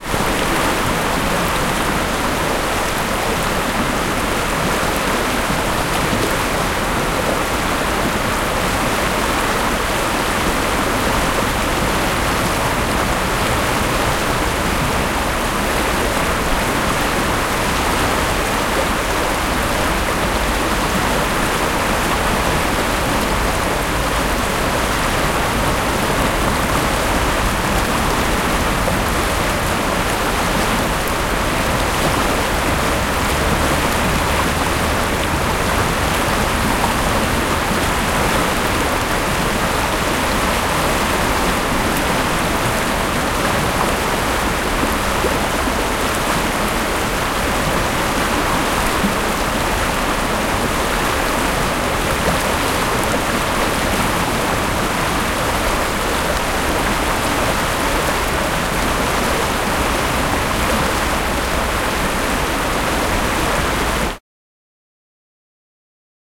2nd of 3 records made more or less in the middle of the Maira river in Savigliano (CN) - Italy with more traffic noise in the background.